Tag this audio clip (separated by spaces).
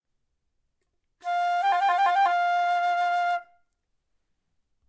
band flute music musical-instrument musician note orchestra practise sample slight-vibrato trill woodwind